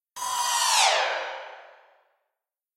cymb shwish 10
cymbal hit processed with doppler plugin
hit, processed, doppler, plugin, cymbal